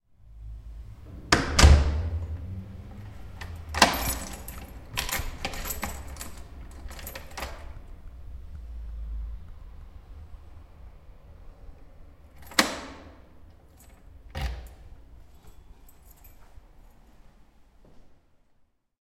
closingmyfrondoor(mono)
Just closing my front door. (mono)
closing, door, field-recording, keys